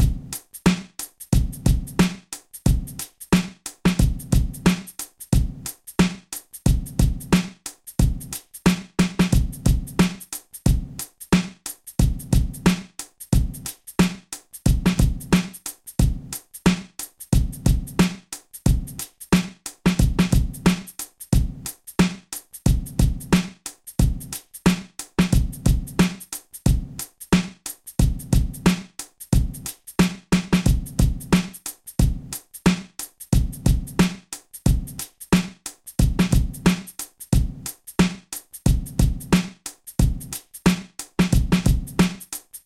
downtempo or hip-hop drum loop. Drum loop created by me, Number at end indicates tempo
downtempo, loop, hip-hop, drumloop, beat, drum